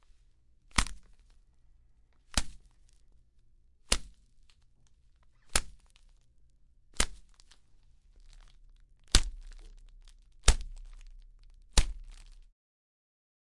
73-Snowball Crashing Against Head
Snowball Crashing Against Head
Against, Crashing, Head, Snowball